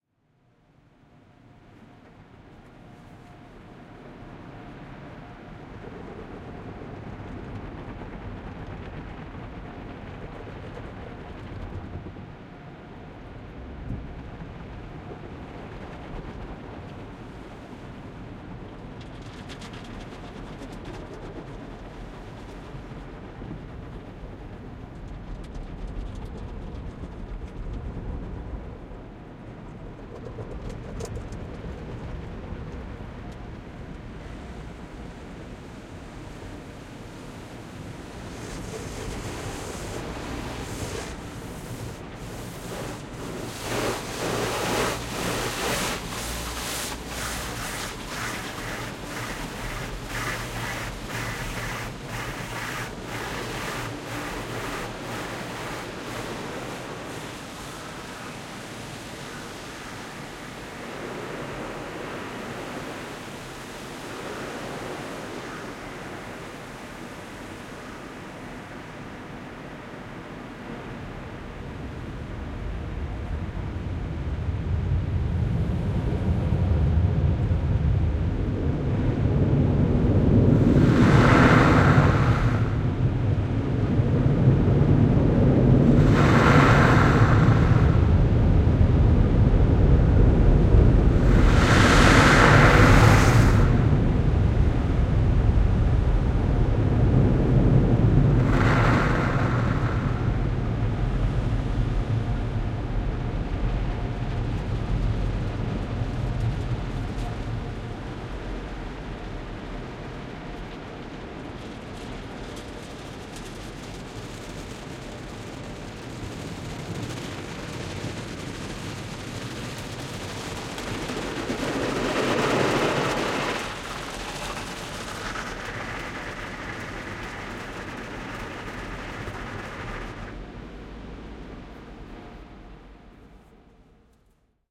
This is the sound of a car going through an automated carwash. Recorded with a Zoom H6 and it's X/Y module from the passenger's side.
cars, city